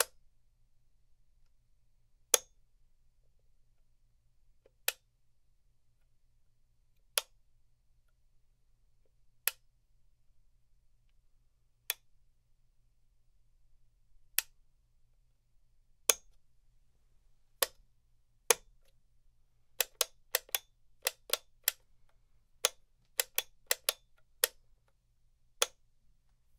Flat wall light switch, push
Flipping a flat wall light switch on and off
wall, switch, push, light-switch